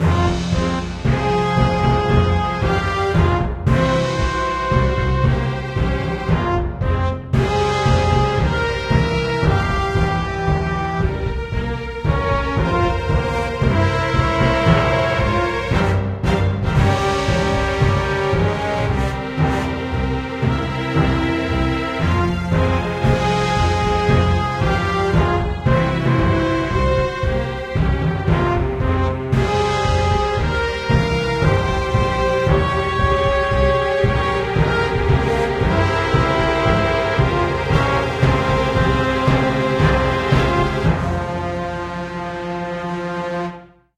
Heroic Charge
Forward into battle is the only option!
You can do whatever you want with this snippet.
Although I'm always interested in hearing new projects using this sample!